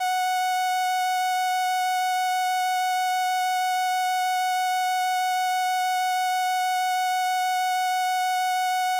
Transistor Organ Violin - F#5
Sample of an old combo organ set to its "Violin" setting.
Recorded with a DI-Box and a RME Babyface using Cubase.
Have fun!
combo-organ, vintage, raw, vibrato, electronic-organ, electric-organ, transistor-organ, analogue, string-emulation, strings, 70s, sample, analog